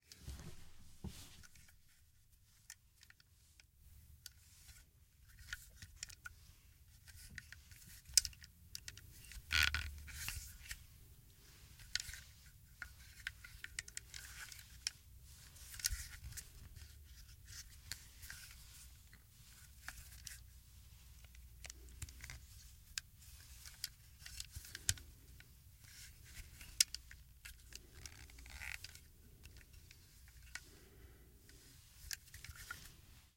Loading a gun....moving around gun in hand.
foley, gun, loading, bullet